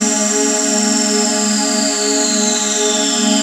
hardbreakfast 10III
Lightful pad with resonant filter going down.
filtred, processed, resonance